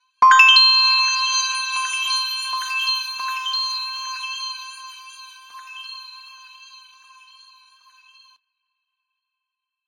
Christmas Bell 1
Christmas Bell, produced in Pro Tools with Native Instruments.